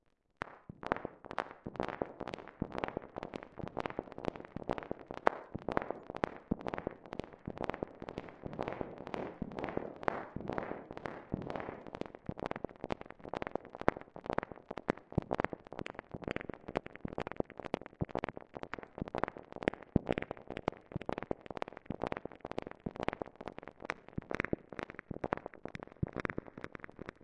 Made in Reaktor 5.